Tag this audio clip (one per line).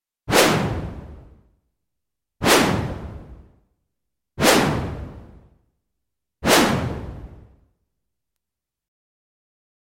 stick
Swing
swoosh
whoosh
whooshes